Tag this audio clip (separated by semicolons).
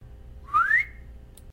short; gamesound; whisle; simple; cartoony; vocal